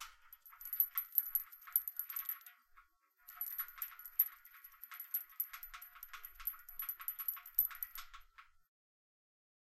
Chain Rattling - 2
SFX - Chains being rattled outside, variation in the intensity of the rattling. Recorded outside using a Zoom H6 Recorder,
Chain, Chain-rattle, Chain-Rattling, Chains, iron, Metal, Owi, Rattle